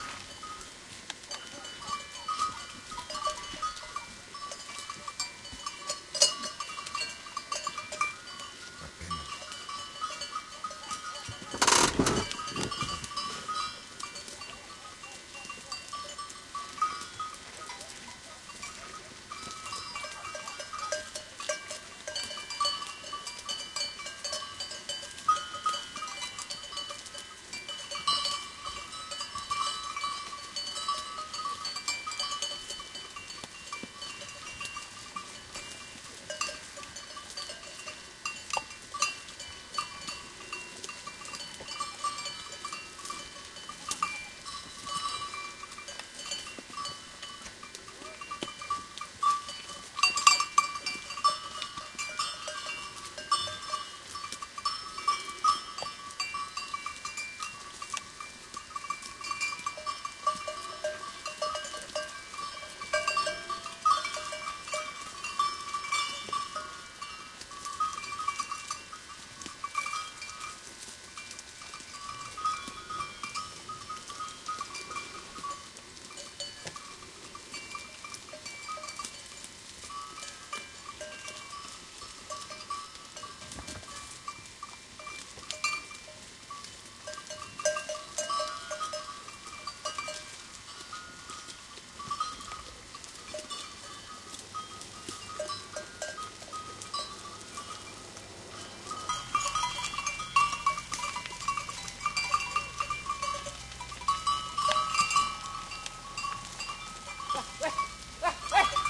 goat-bells
field-recording goat bells ambient
recorded with sony 1000 dv camcorder
in kalamata Greece